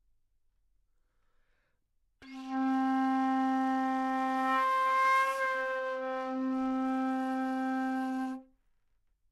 Flute - C4 - bad-timbre
Part of the Good-sounds dataset of monophonic instrumental sounds.
instrument::flute
note::C
octave::4
midi note::48
good-sounds-id::3200
Intentionally played as an example of bad-timbre